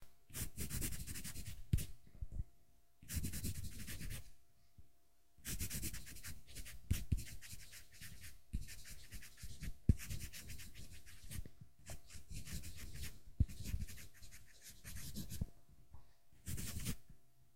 writing in pencil
Writing on a piece of paper in pencil. Recorded with a Rode NTG-2 mic into Zoom H4.
paper, scribble, pencil, handwriting, writing